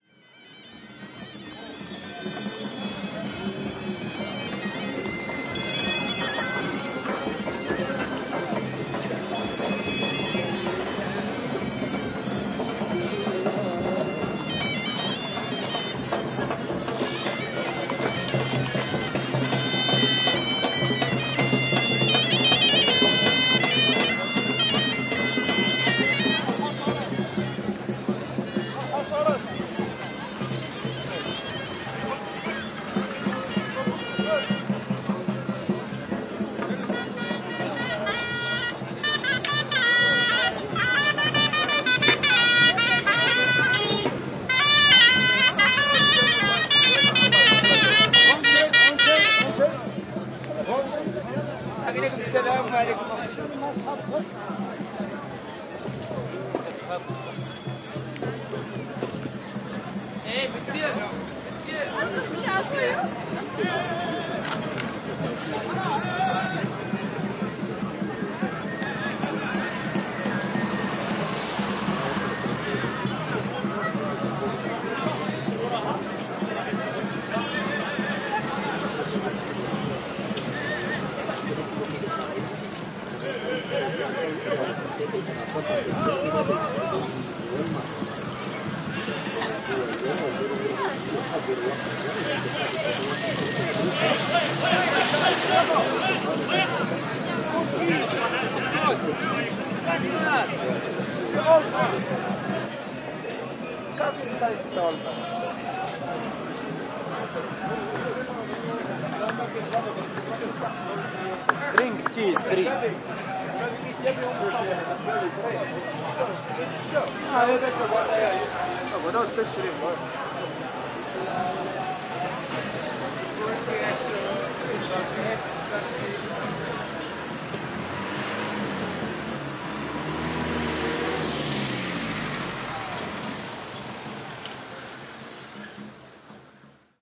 This recording was made in Medina, Marrakesh in February 2014.
Binaural Microphone recording.